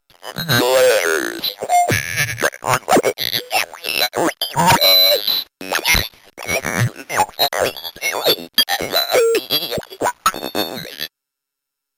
MORE DIGITAL PUKE. one of a series of samples of a circuit bent Speak N Spell.